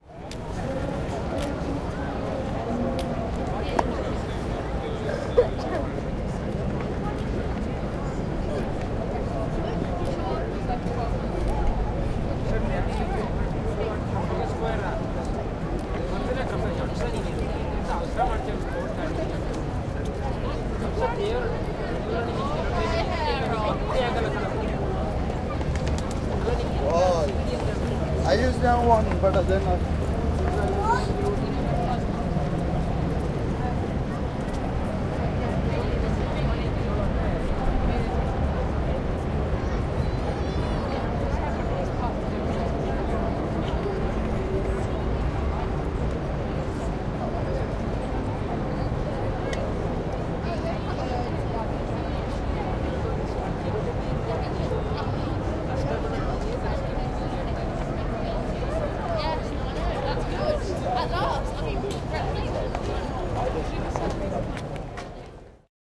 130406 london trafalgarsquare pos2
atmo of london's trafalgar square, recorded by nelson's column. it is late morning and the place is already full of tourists and traffic.
this clip was recorded on the right side of the steps going up to the national gallery. lots of tourist voices from all over the world.
recorded with a zoom h-2, mics set to 90° dispersion.
atmo, city, england, field-recording, london, monument, noisy, people, tourist, traffic